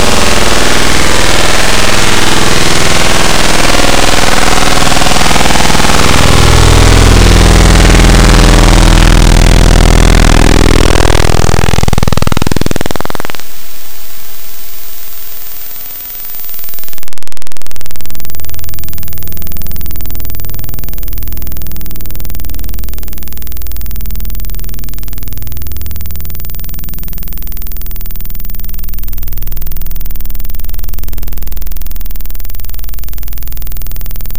Sounds intended for a sound experiment.
derived from this sound:
Descriptions will be updated to show what processing was done to each sound, but only when the experiment is over.
To participate in the sound experiment:
a) listen to this sound and the original sound.
b) Consider which one sounds more unpleasant. Then enter a comment for this sound using the scores below.
c) You should enter a comment with one of the following scores:
1 - if the new sound is much more unpleasant than the original sound
2 - If the new sound is somewhat more unpleasant than the original sound
3 - If the sounds are equally unpleasant. If you cannot decide which sound is more unpleasant after listening to the sounds twice, then please choose this one.
4 - The original sound was more unpleasant
5 - The original sound was much more unplesant.
image-to-sound, sound-experiment, databending, experimental, unpleasant